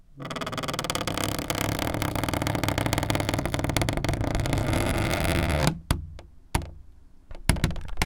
Door creak 2
Another creaky treedoor. Unprocessed for you to process as you wish.
door, tree, creak